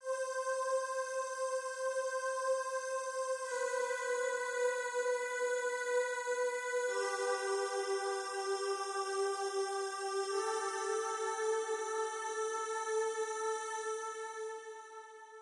chord bit
chords for the trance tune
LMMS, chord